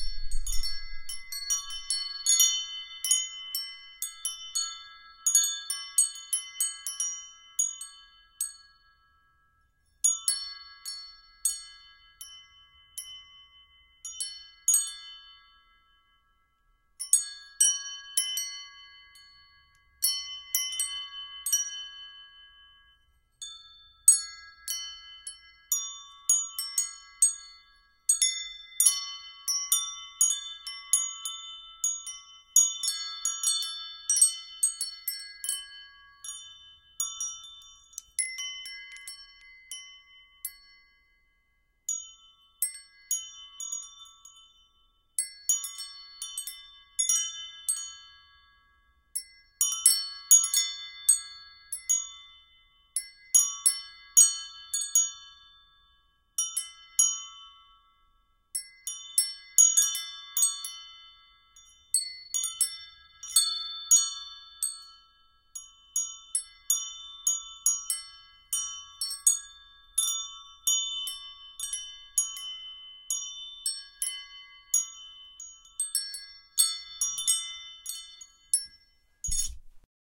Studio recorded bell microphone schoeps Ortf mixed with Neuman U87
Foley, Studio, Bells